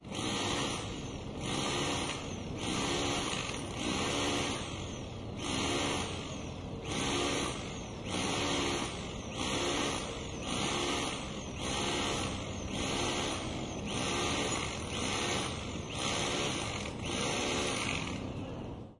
Electric pole chain saw small power tools edlarez vsnr
Electric pole chain saw small, trimming tall bush and trees, power tools edlarez vsnr. clean ambience and recording, no dialogue.
bush, power-tool, chain-saw, chainsaw, cutting, electric, pole, trimming, tree